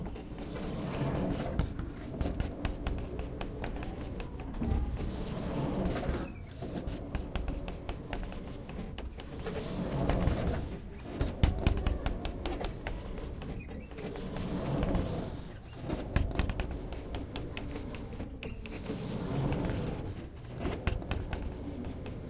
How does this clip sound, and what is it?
Queneau porte sas 02
ouverture et fermeture d'une porte coulissante
close, door, mechanical, open